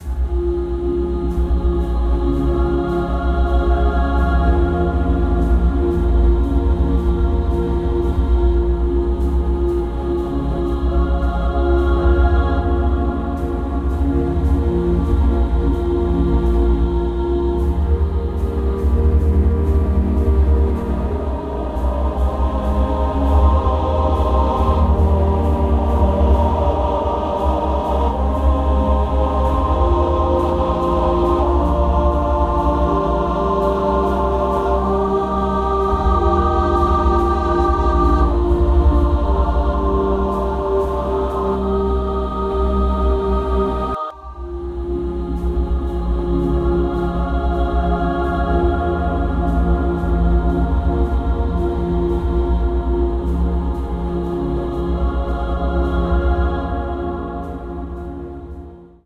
Angelic Choir
A virtual choir with pad, a soft and sweet sound.